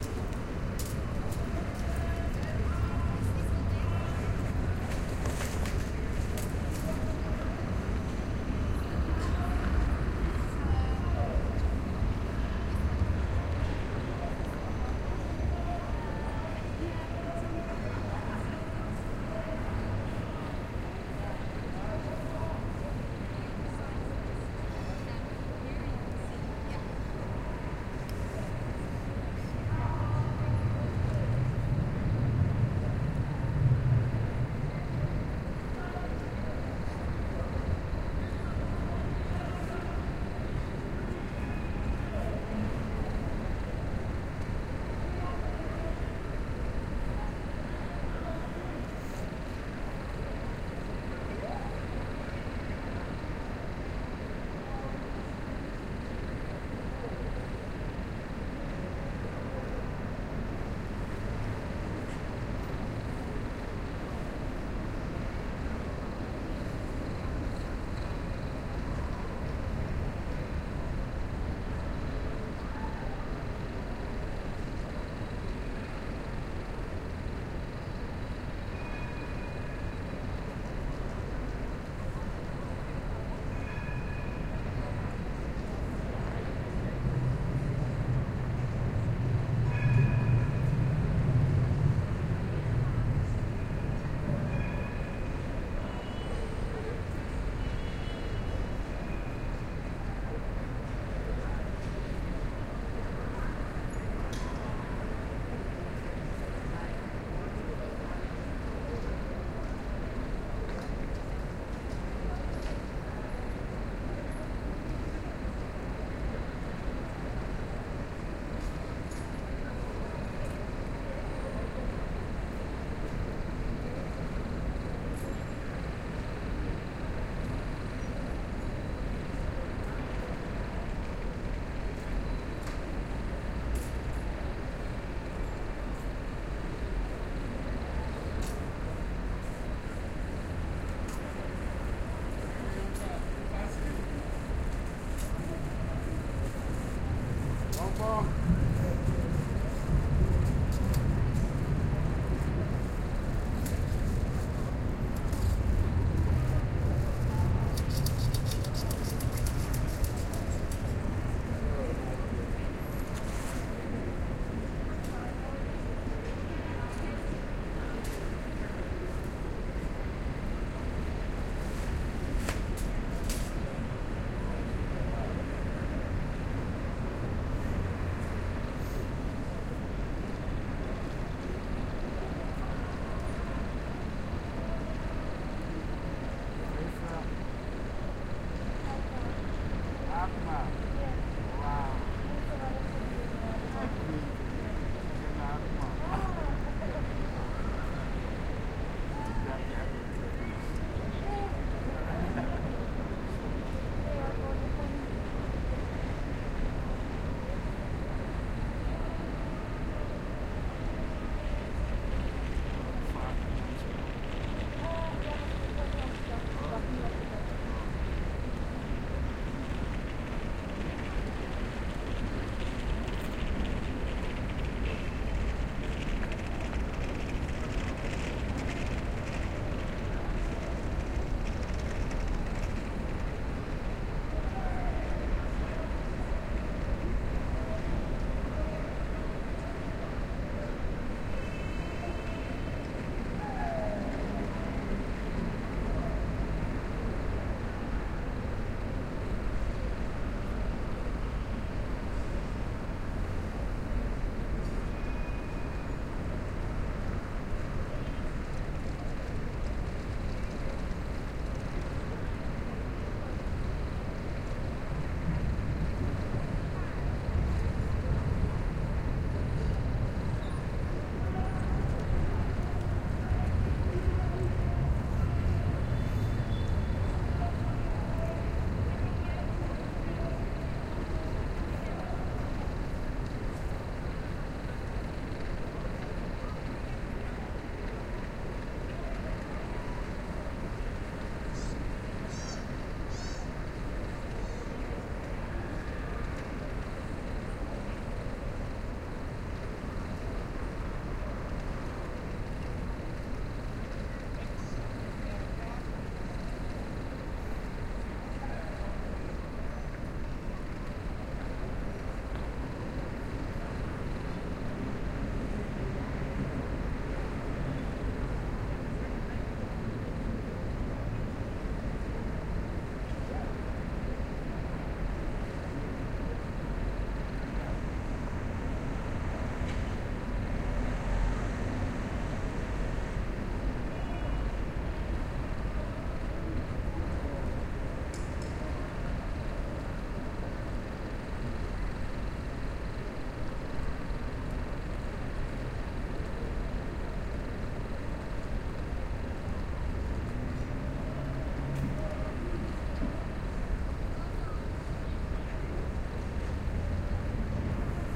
binaural field recording at amsterdam city (dam square) in september.
recorded with ohrwurm mk2 and tascam recorder